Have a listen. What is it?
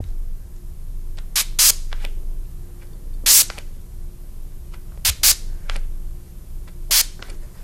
spray mist
spraying a scent.
body-mist, spray